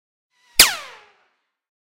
A whizzby sound effect created using synth.
Bullet, Gun